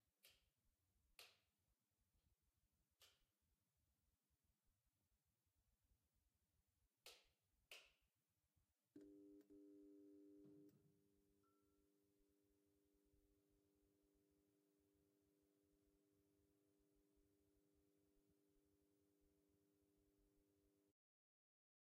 LIGHT CLICKS AND LIGHT BLINKER
This is the sound of garage lights switching on and flickering.
blinker, clicks, flicker, hiss, light, lights